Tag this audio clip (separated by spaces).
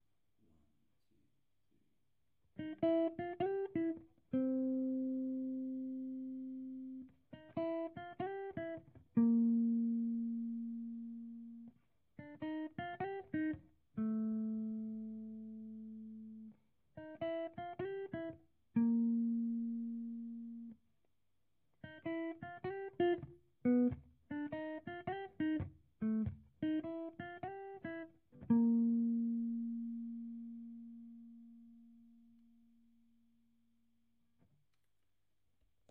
humbucker strings Blues Marshall triple 89bpm melody clean SG Gibson 1x12 13 guitar electric gauge flat 0 wound bar 12